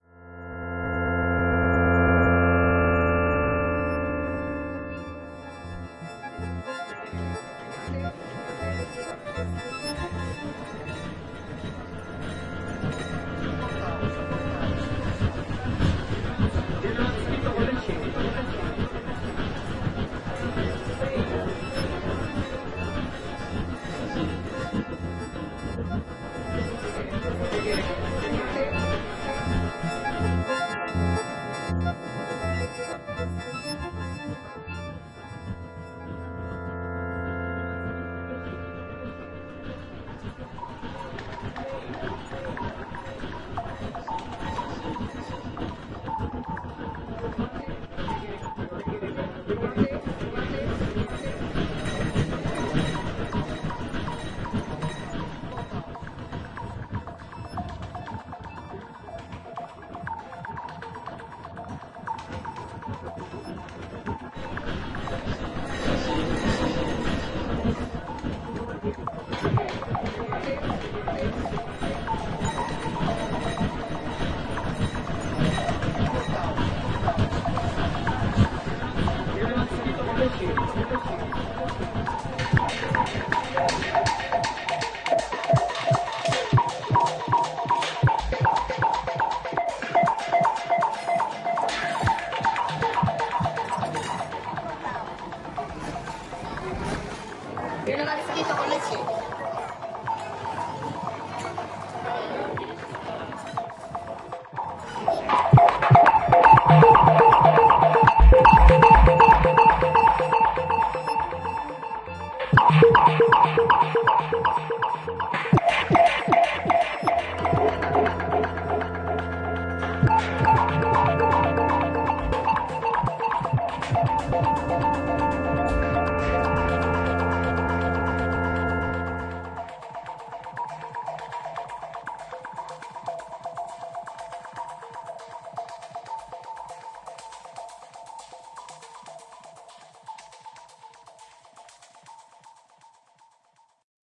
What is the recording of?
This sound was created for the continuum-2 dare.
Rhythms of the day vs. rhythms of the night a perfect juxtoposition?